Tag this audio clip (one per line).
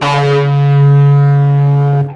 guitar
electric
multisample
bass